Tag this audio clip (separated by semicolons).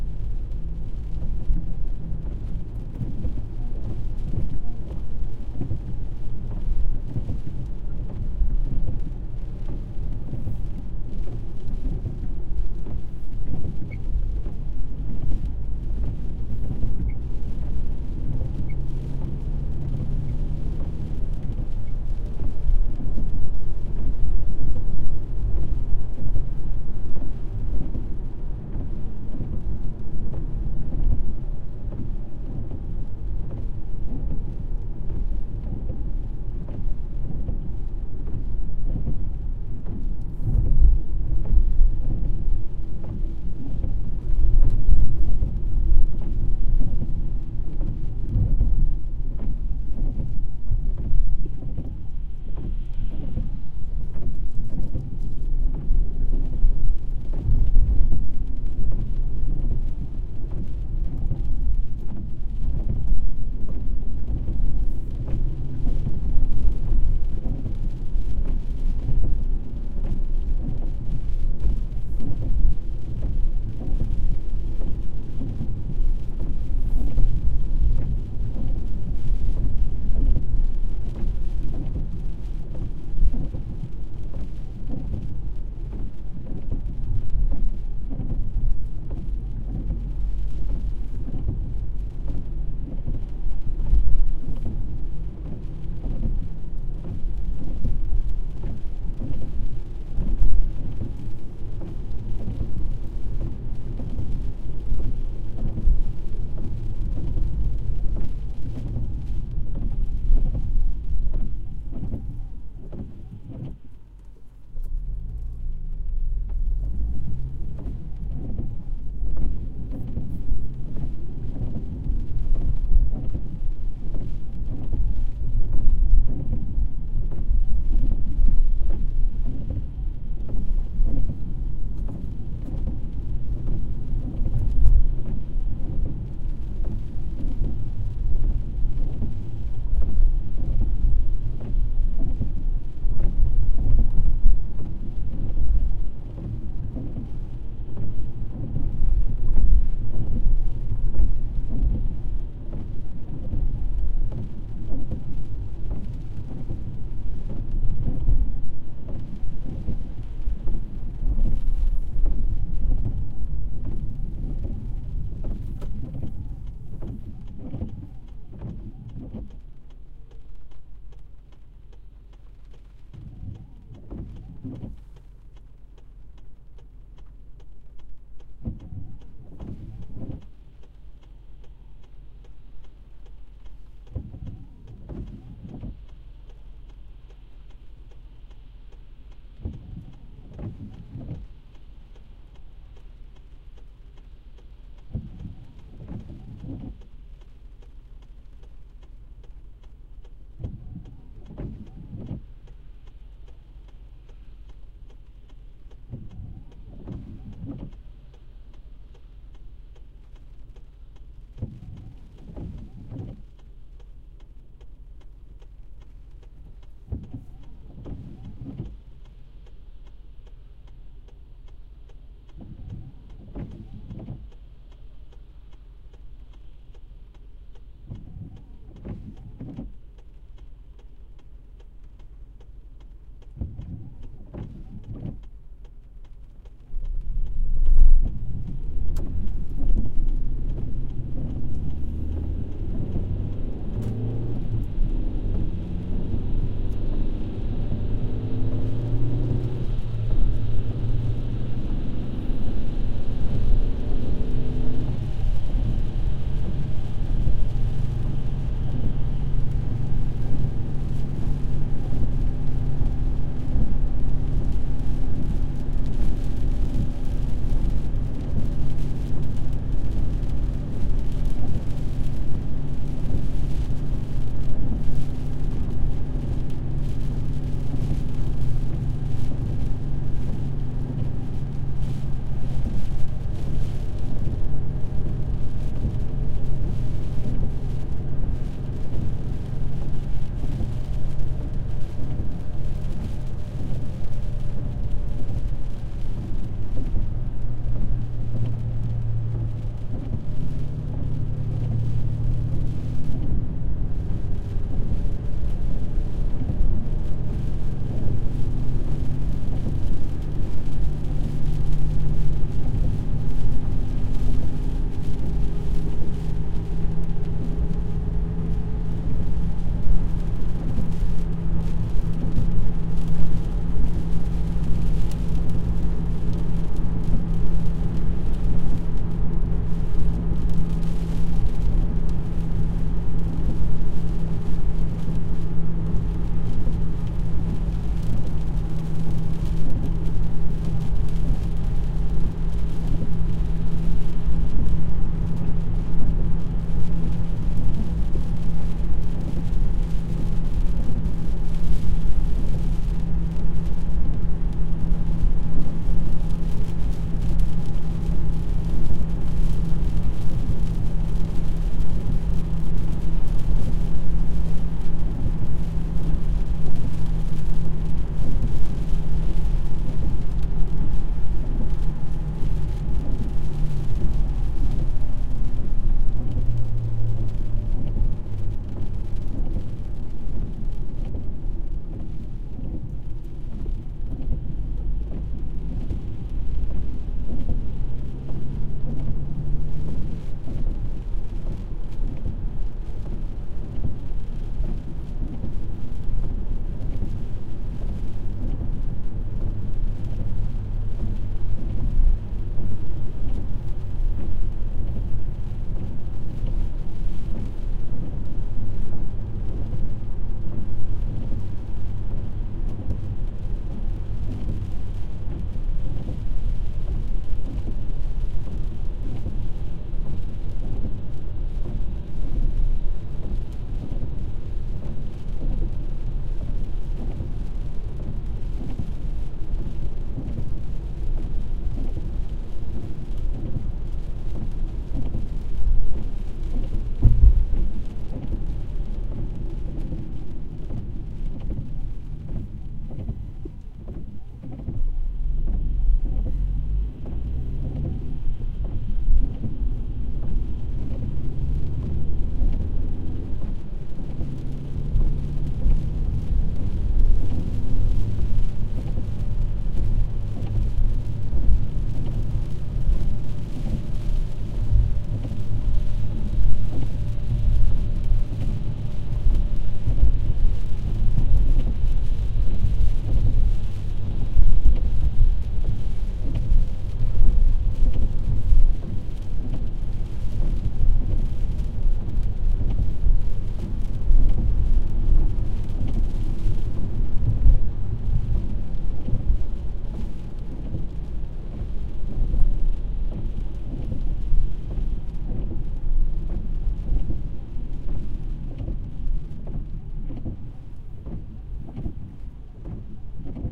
car; city; driving; field; inside; noise; raining; street; traffic; windshield; wiper